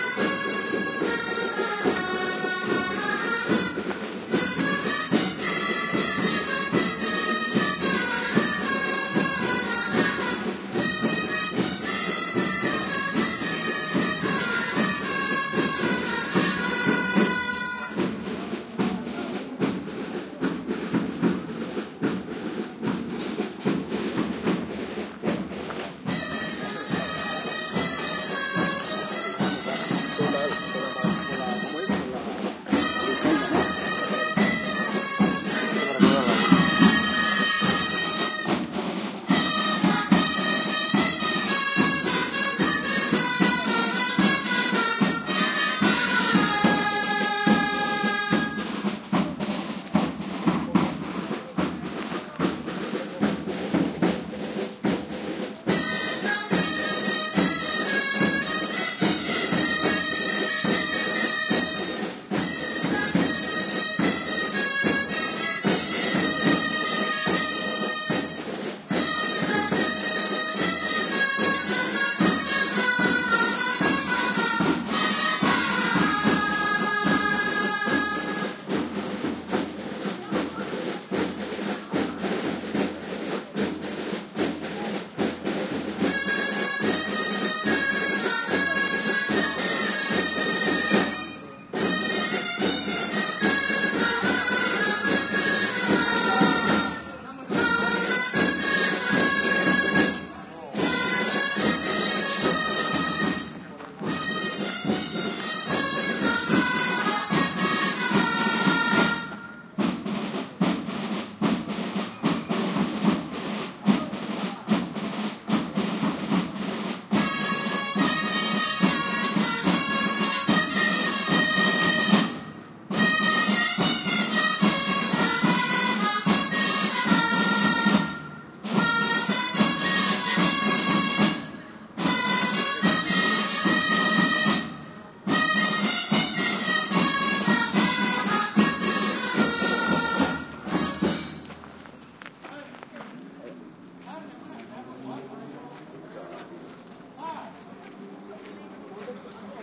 group playing a popular song with drums and dulzainas (Spanish instrument similar to a clarinet), park ambiance in background. Recorded on Parque del Castillo, Zamora, Spain using PCM M10 with internal mics